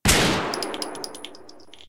Desert Eagle .50AE Last round shot
Magnum Research Desert Eagle Mk. XIX System .50AE last round shot, slide locked. Moderate echo.
pistol, desert-eagle, shot, gun, magnum-research